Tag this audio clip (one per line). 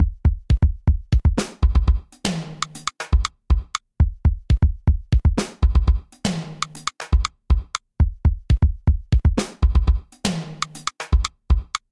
groovy
loops
music
Percussion-loop